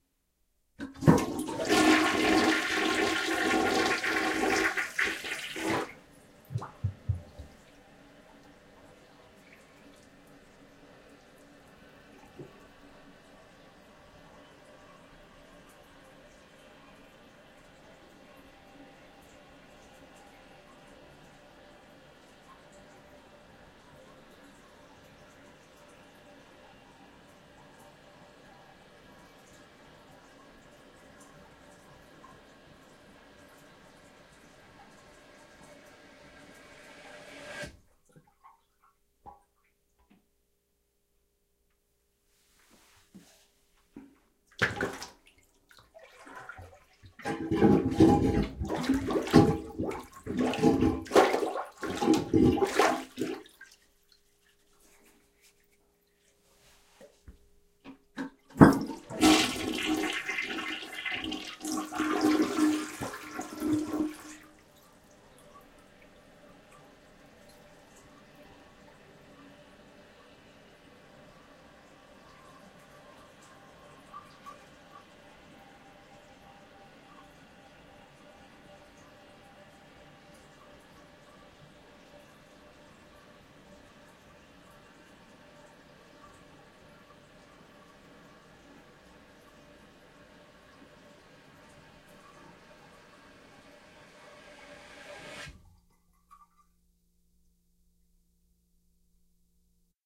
Flush-Plunge-Flush
Full sequence including flushing a toilet, plunging a bit, then flushing again.